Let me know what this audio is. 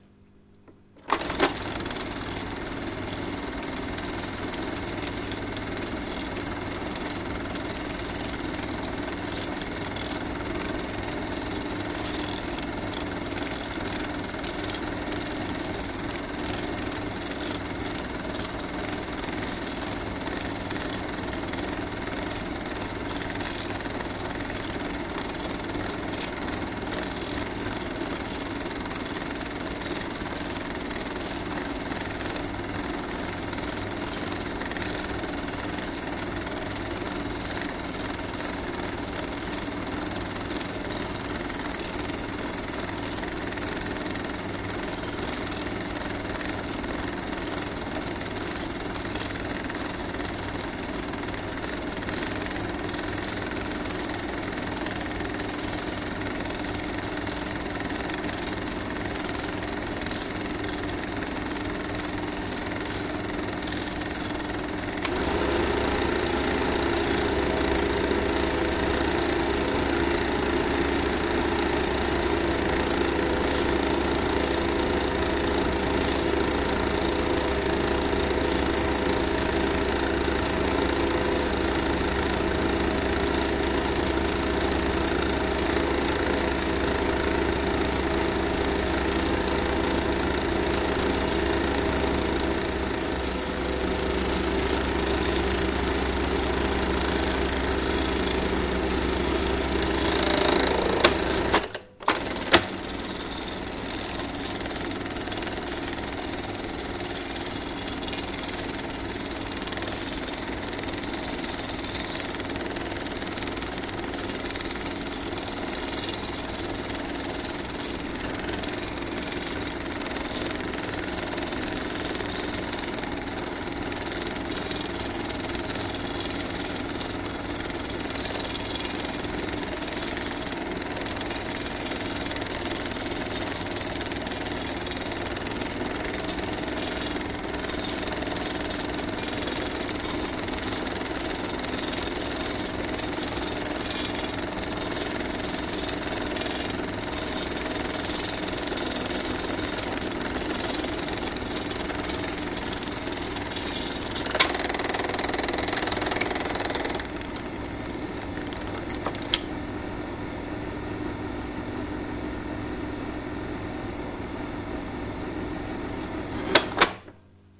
The sound of a working Eumig S931 Super8 Projector.
Recorded with Zoom H6.
70s, 80s, 8mm, 90s, cine, cinema, coil, electric, film, home, lamp, light, loop, mechanical, movies, projection, projector, speed, start, stop, super8